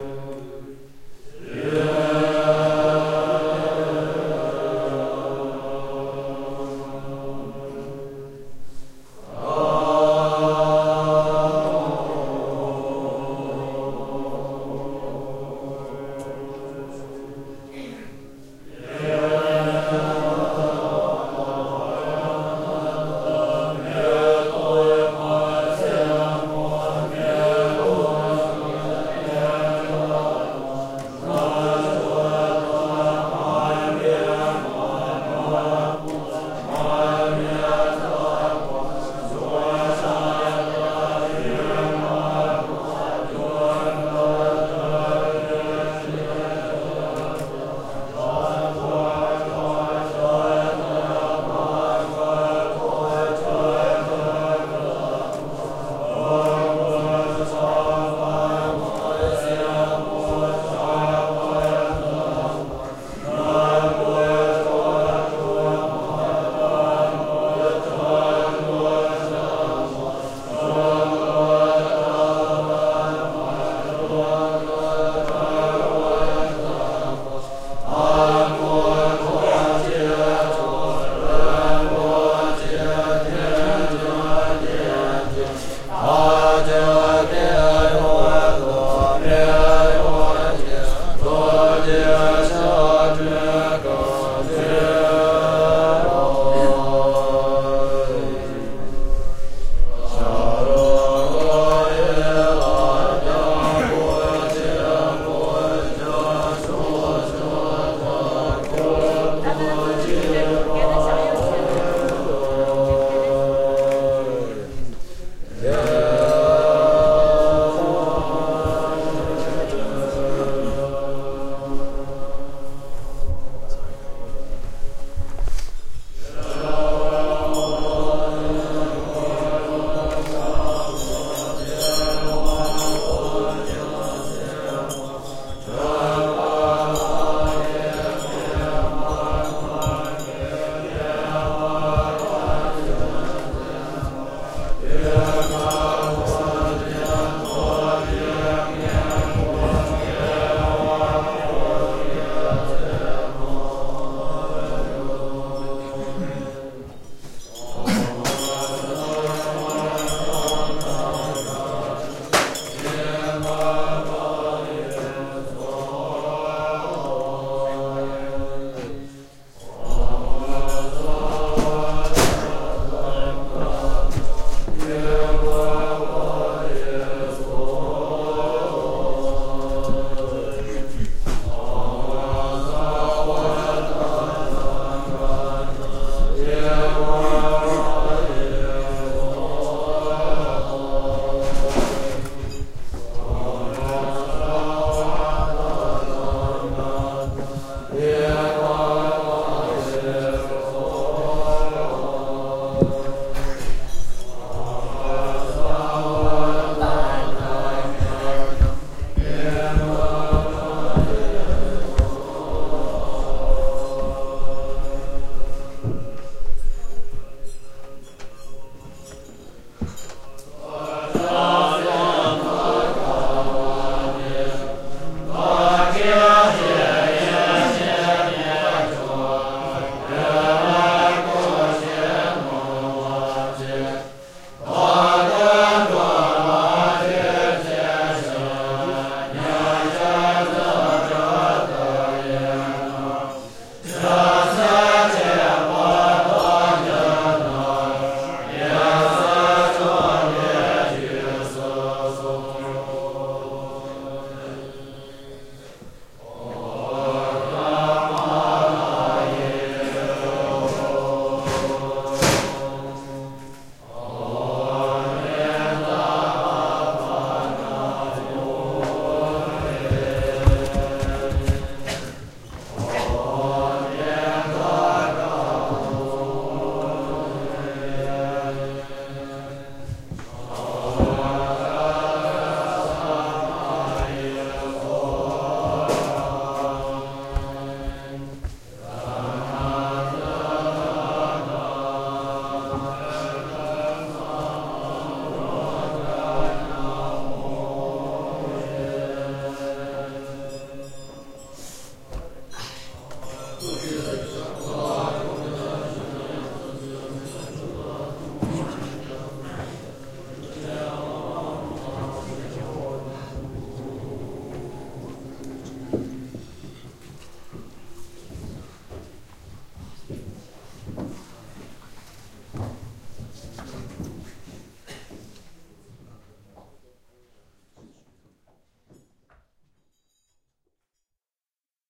ganze gompa monks དཀར་མཛེས
dr1 field recording inside Ganze Gompa, Tibet, of the monks morning meditation prayer ritual.
དཀར་མཛེས